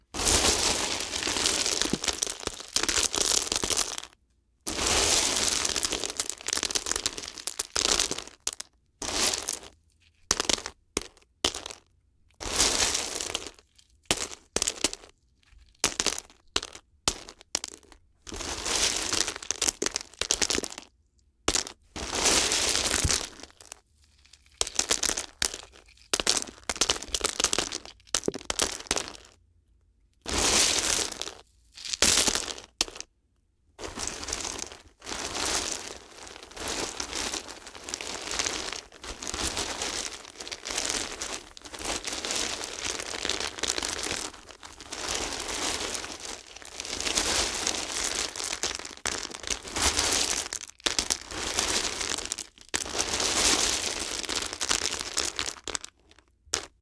ice cubes dig through and scoop up and let cubes fall drop on pile rattle
up, pile, cubes, fall, dig, ice, rattle, scoop, drop, let, through